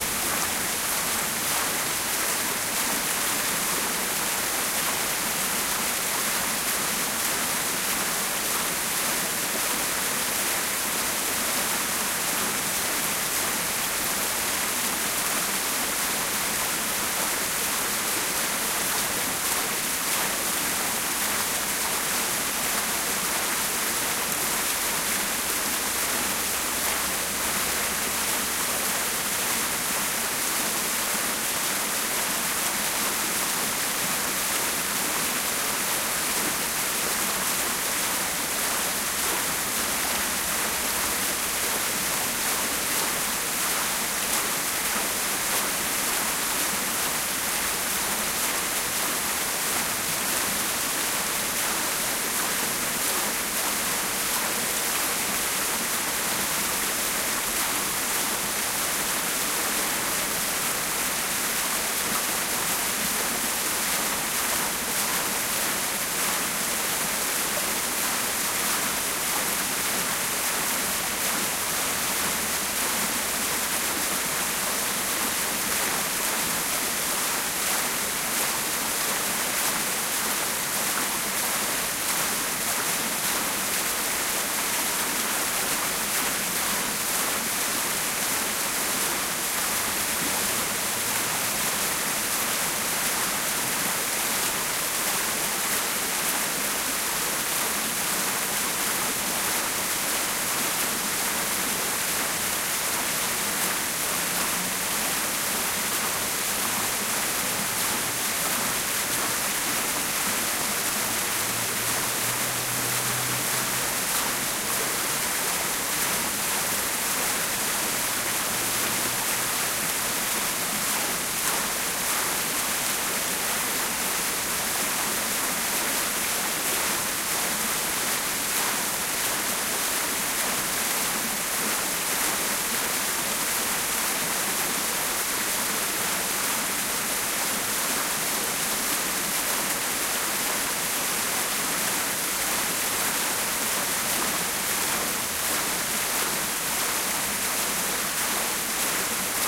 Water Fountain Sound
water-sound, splashing, water-fountain, water, field-recording, fountain, splash